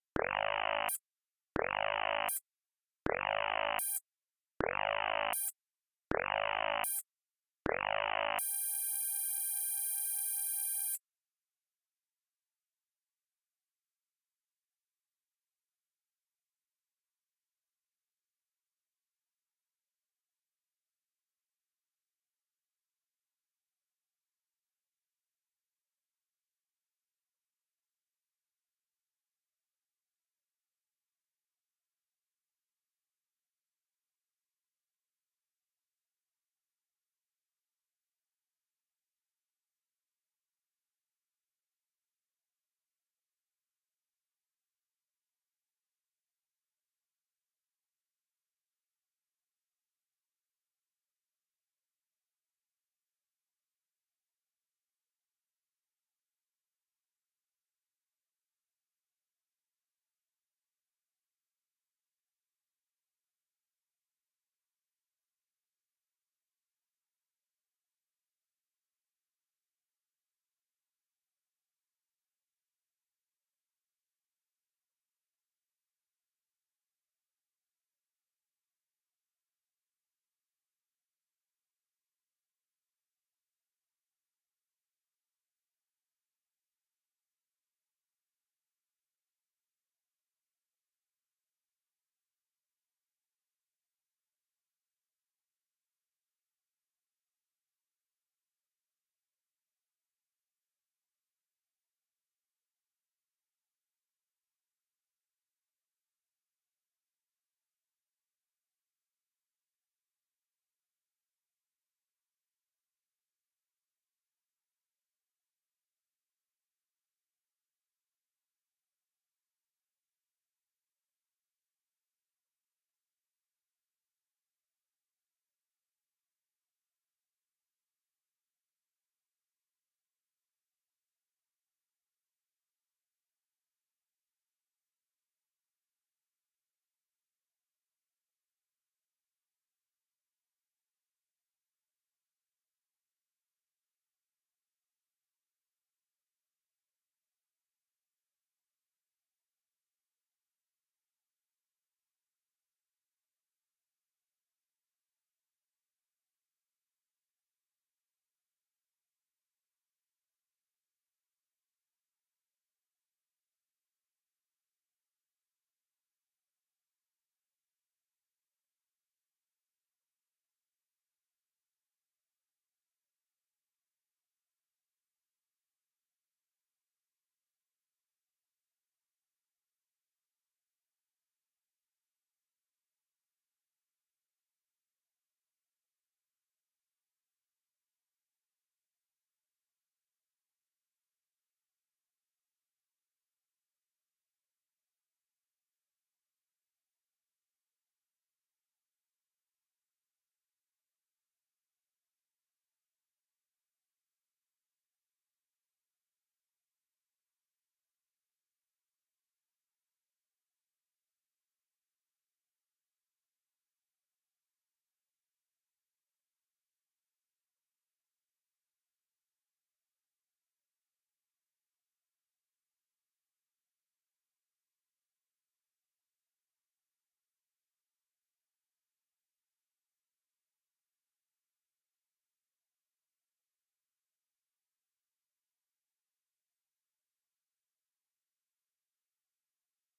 Papatone Pictures Klaxon Rapid 2
klaxon alarm alert space - faster than the first.
alert, warning